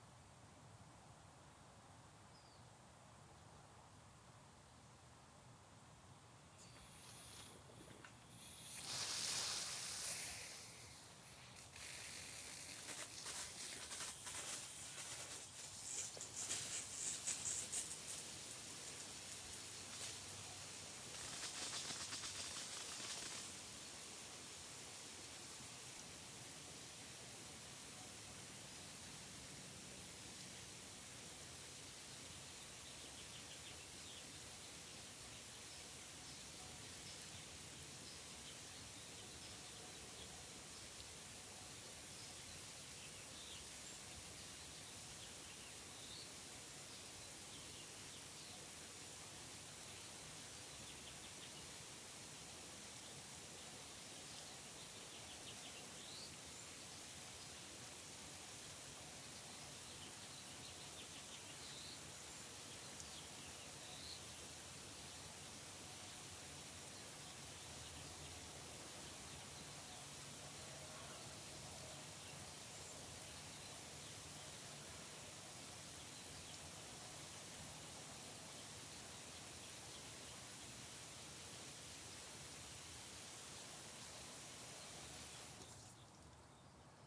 Every morning the sprinklers turn on at my apartment, so I decided to record them turning on and off. Unfortunately, some outside ambience creeps in, but they're still fairly clean.
Recorded with: Sanken CS-1e, Fostex FR2Le